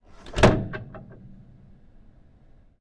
Piano slamming; close
Recording of a piano being slammed shut.
shut, slam, piano